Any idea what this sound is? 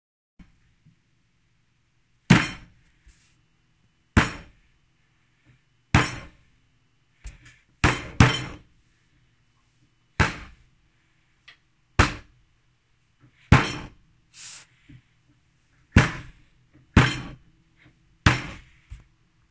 sound of a table banging with objects on it
bang, table, hit